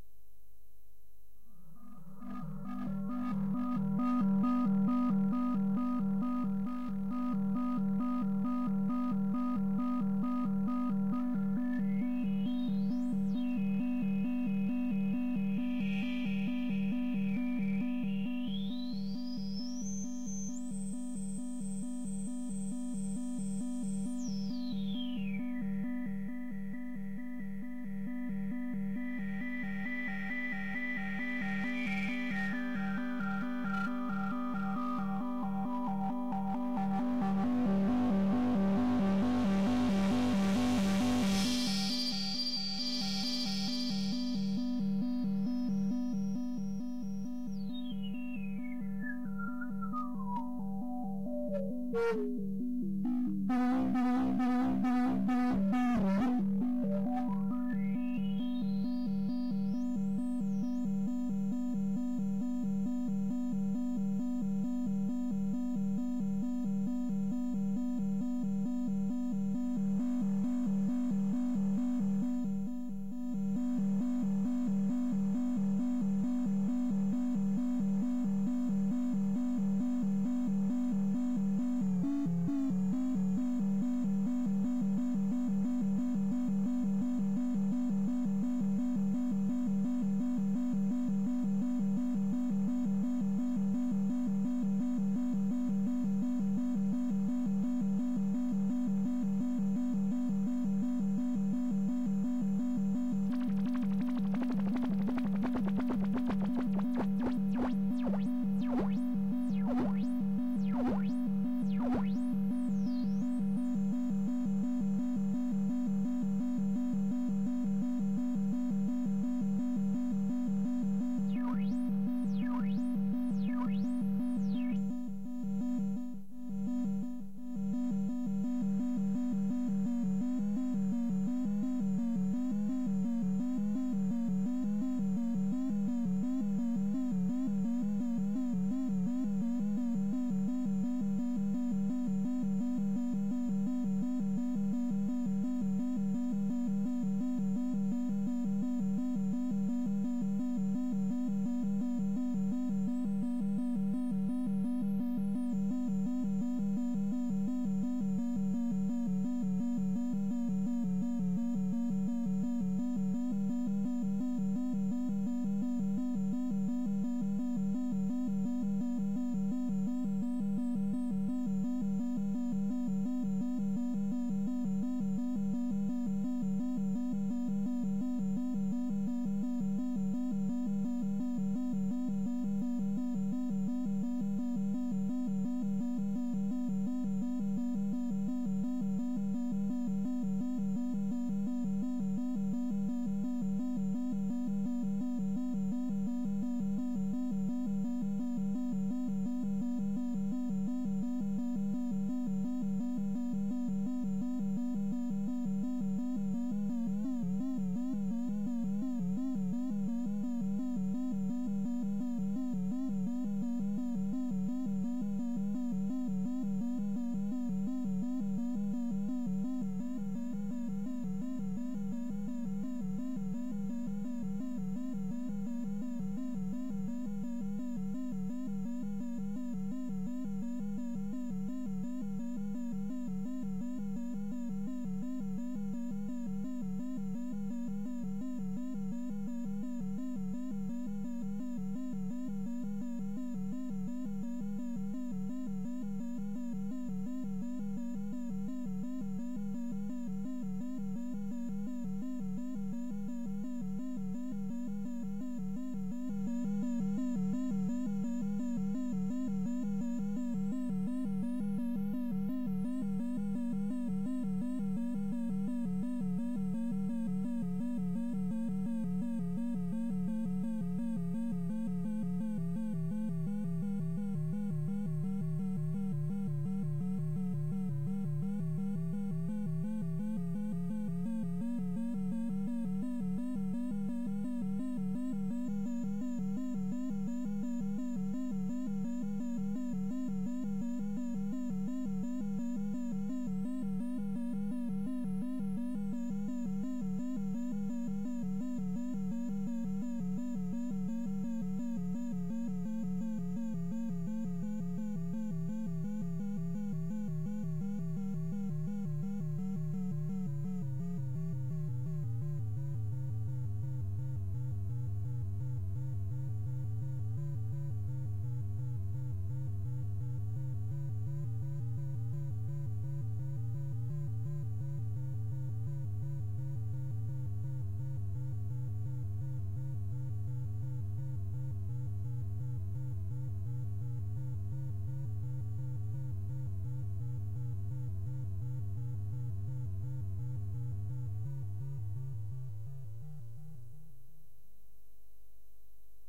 used originally as a hyperspace radio connection sound. can be used otherwise